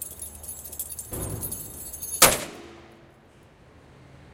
The microphone on a metal bench, keys are jingled above it, then dropped onto the bench. The resulting bang is quite impressive.
bang, bench, drop, jingle, keys, metal